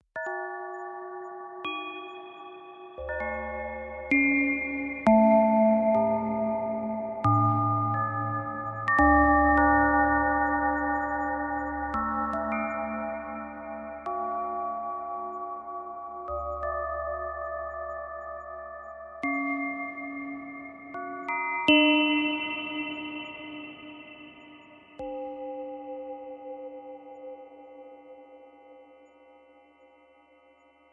ZDF Kymes
example from ZDF Filters - tuned bandpass filters are excited
physical-model, filterbank, resonator, kyma, chimes